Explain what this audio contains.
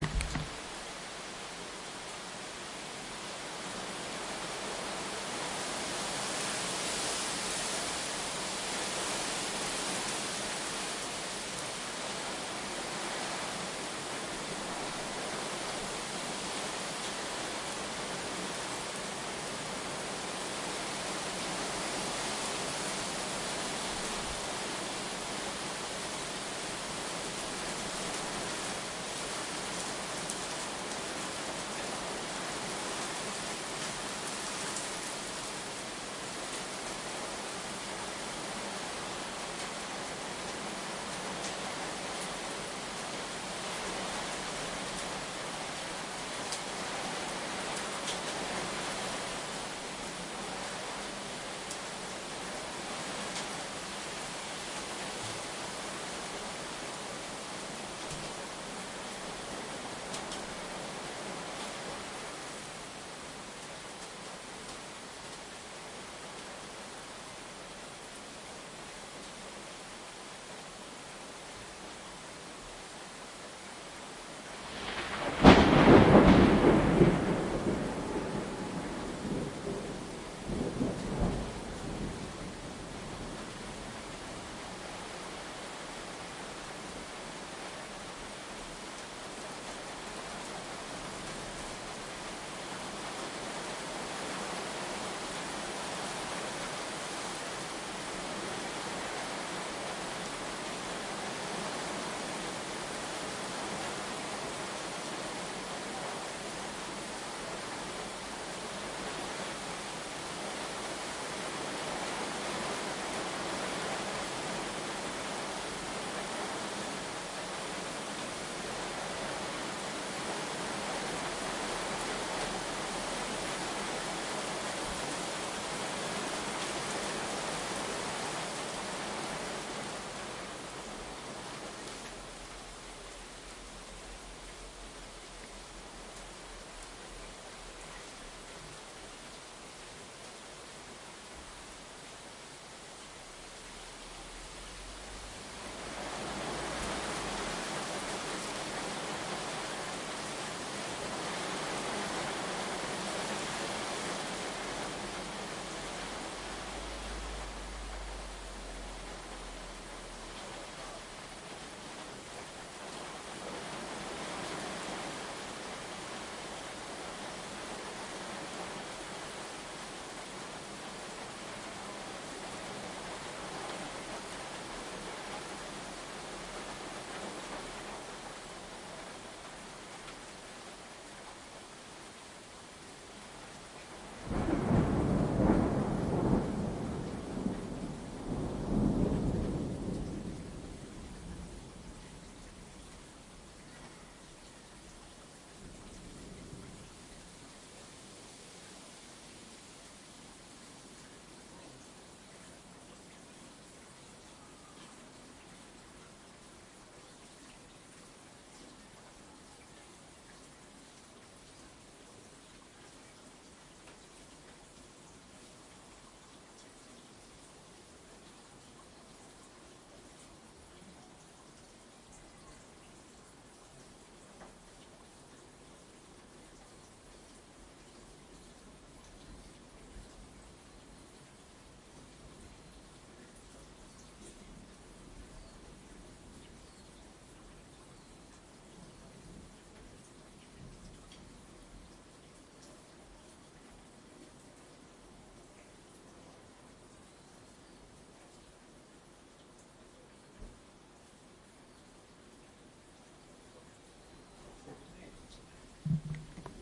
Heavy rain with thunder
A short summer storm with two thunders recorded until the rain stops
ends, wet, heavy, storm, bolt, ending, Finland, summer, rain, clouds, electricity, tornado, rumble, thunder, explosion